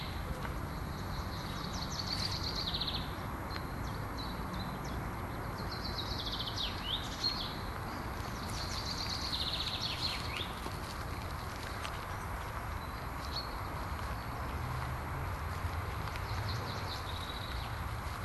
Bird chirps in the forest.
ifp-899, birds, forest, field-recording, iriver, poland, szczecin, outdoor, cemetery